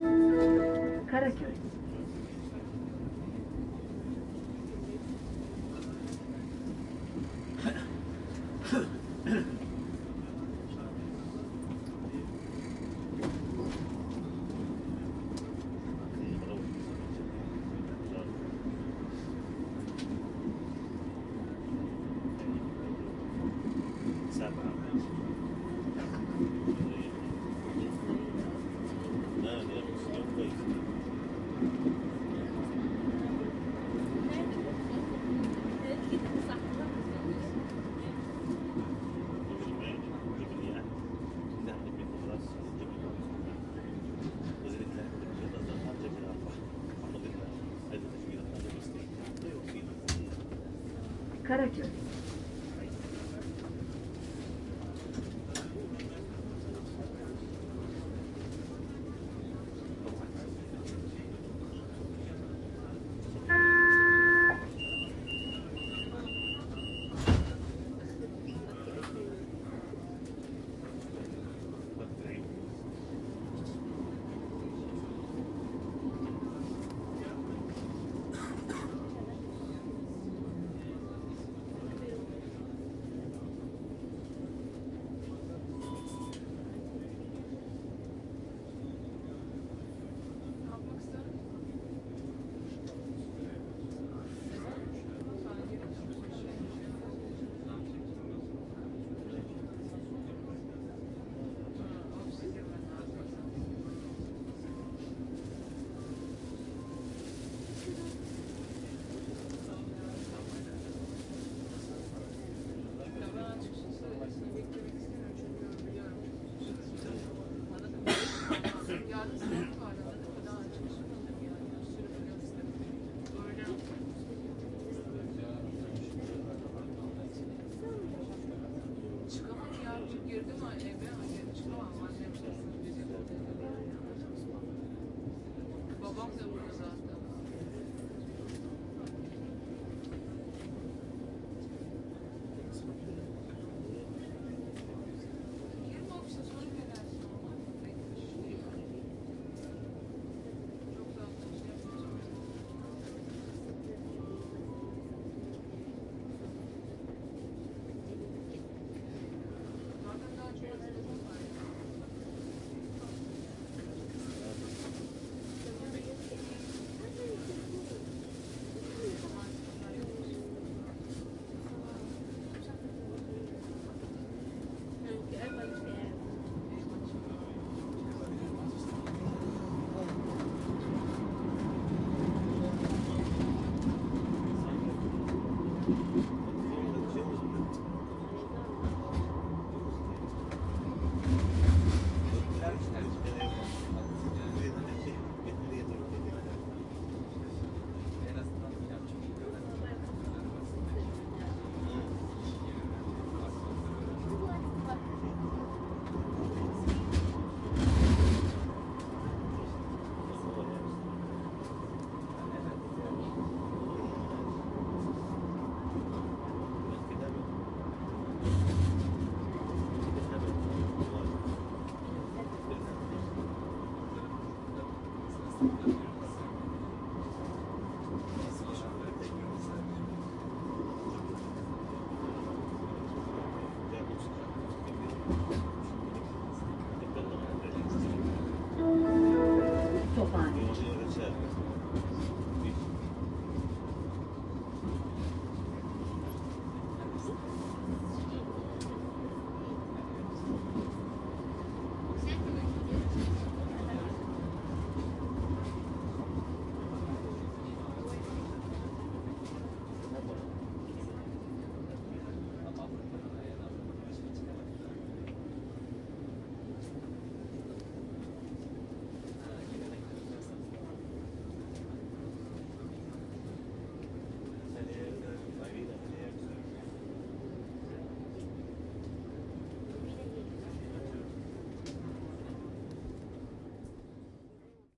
Tram Ride Amb Indoor, Istanbul Turkey
Tram Ride Ambience Recorded in Istanbul
Amb, city, Ride, Indoor, Istanbul, Tram, Turkey, ambience